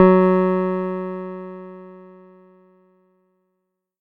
006-JEN Pianotone -F#3
Jen Pianotone 600 was an Electronic Piano from the late 70s . VOX built a same-sounding instrument. Presets: Bass,Piano and Harpsichord. It had five octaves and no touch sensivity. I sampled the pianovoice.
Electronic-Piano, Jen-Piano, Piano, Pianotone